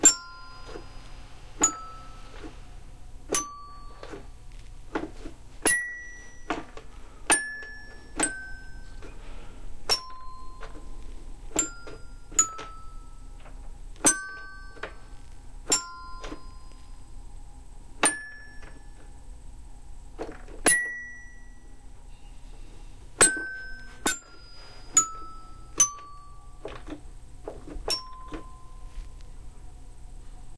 Baby Xylophone
baby, xylophone